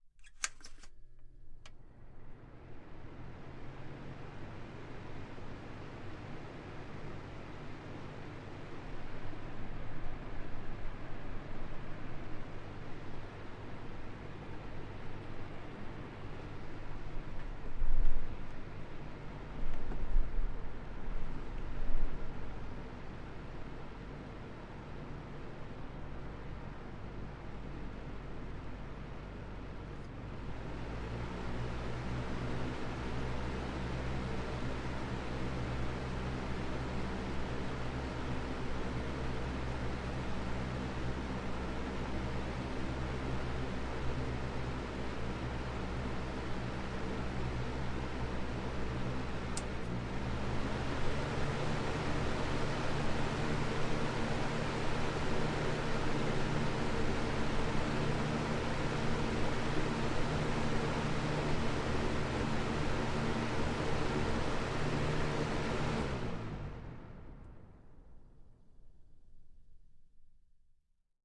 Floor fan turning on and going through all the different speed settings.
Close-up, Floor-fan, Fan